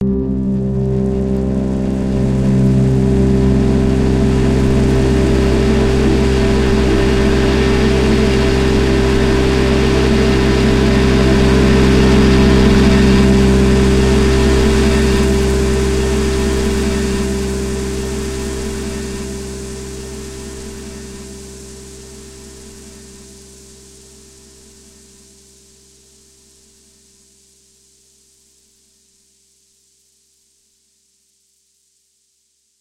"Alone at Night" is a multisampled pad that you can load in your favorite sampler. This sound was created using both natural recordings and granular synthesis to create a deeply textured soundscape. Each file name includes the correct root note to use when imported into a sampler.
ambient, granular, pad, rain, strings, synth, multisample, tremolo